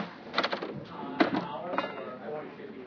Door opens
door, open, slam